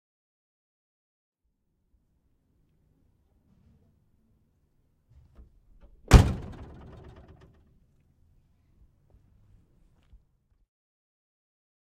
1950 Ford Mercury exterior door slam
Recorded on Zoom H4N with Rode NTG-3.
The sound of a door on a vintage 1950 Ford Mercury car slamming shut recorded from outside.
ford, 1950